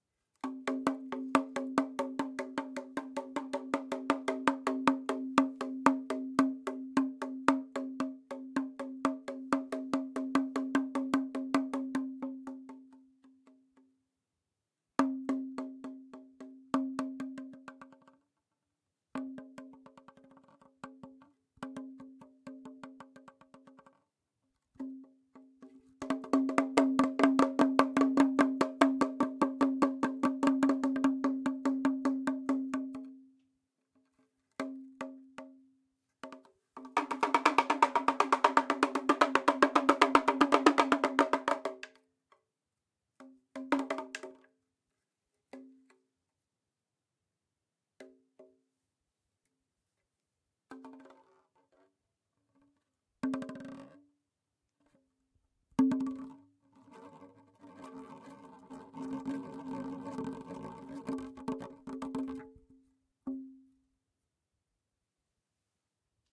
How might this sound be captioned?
Rattle Drum
african, bead, beat, drum, Rattle, rhythm, toy